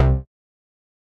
Synth Bass 005
A collection of Samples, sampled from the Nord Lead.
lead, nord